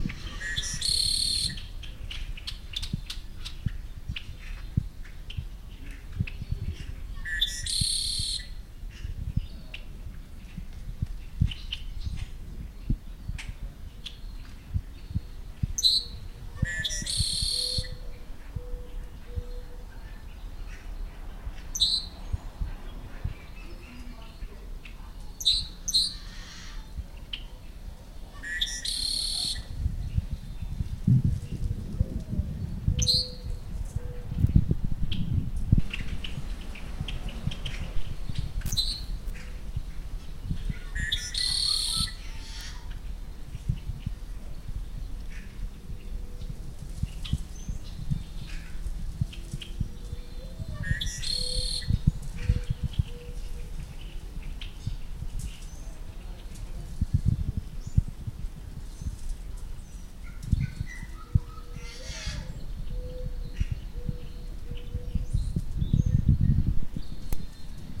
birds outside my house